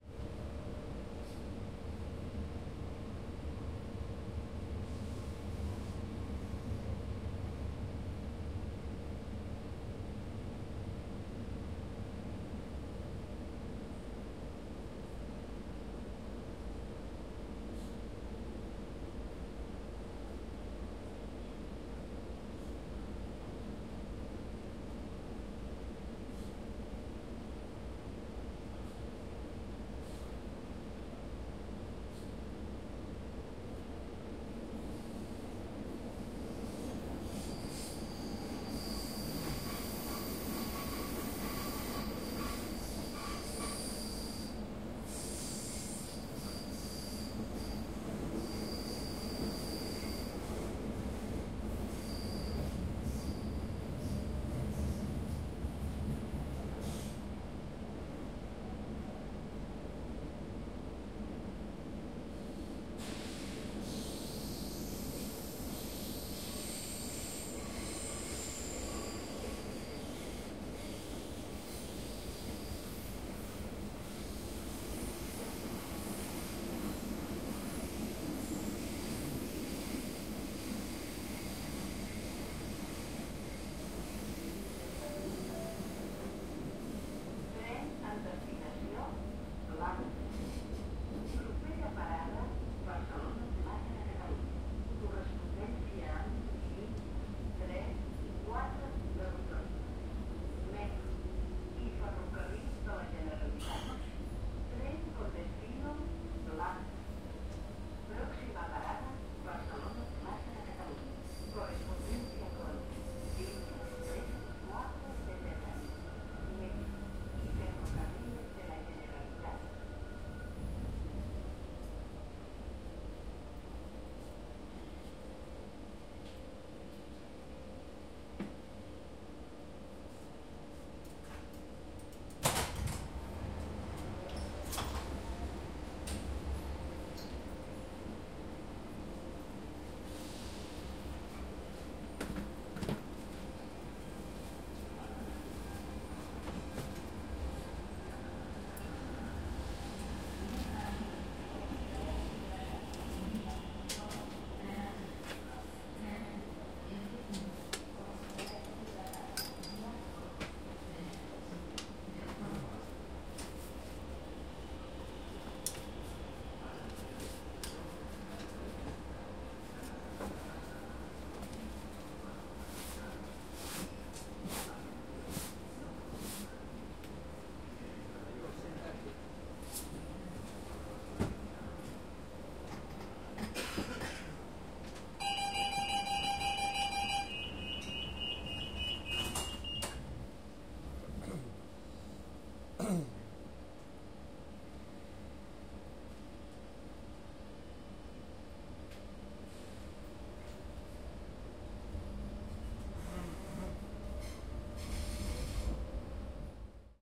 SHORT TRIP ON TRAIN
Train rides, stops, doors open, people come in, doors close, train starts moving again. Recorded in a Renfe train in Barcelona Spain with a TASCAM DR 40
interieur-ambience-train-portes-gent, interior-train-ambience-doors-smallcrowd, interior-tren-ambiente-puertas-gente